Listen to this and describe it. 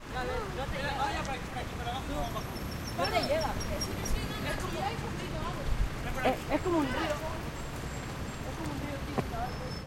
street-people-noise 1
This is a recording of the sound of the ambience of a street. You can hear people talking.